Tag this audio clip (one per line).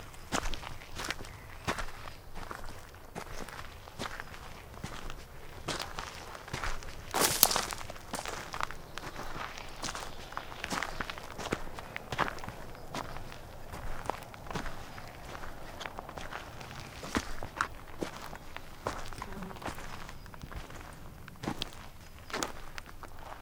footsteps
walk
foot
step
walking
ground
forest
feet
steps